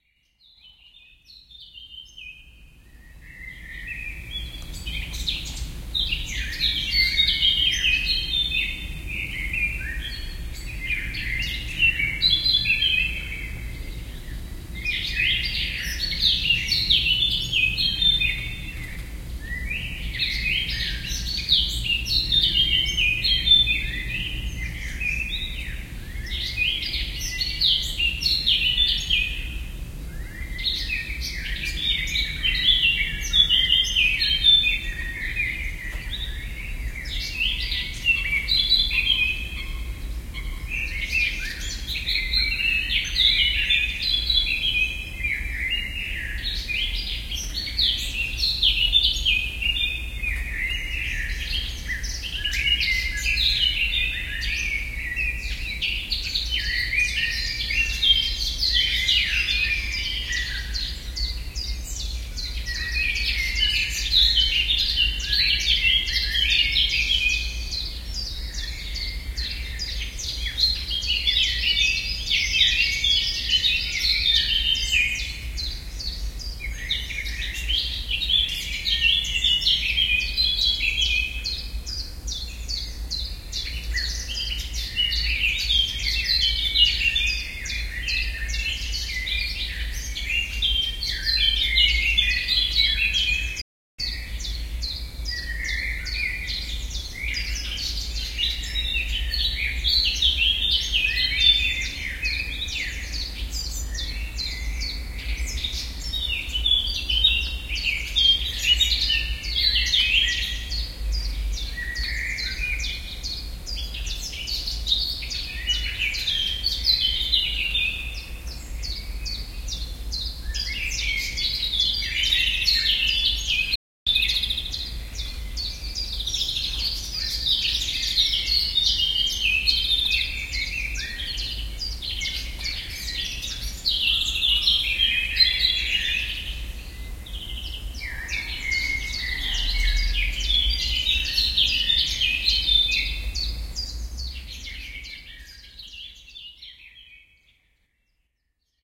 It was 5am in the morning when this blackcap song was recorded in May
2006, using once again the Sharp MD-DR 470H minidisk player and the
Soundman OKM II. Somehow blackcaps seem to be heard all the time this spring in Hanover. What a song!
binaural, blackcap, field-recording, song